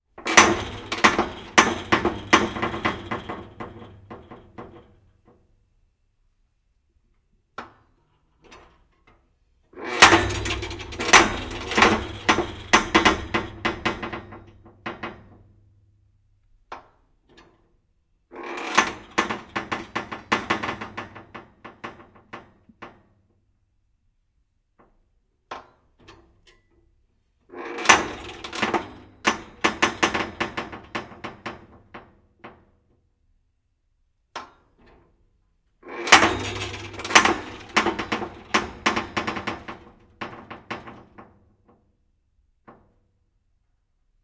metal bender creaks clacks bending creaks harder
bender, bending, clacks, creaks, metal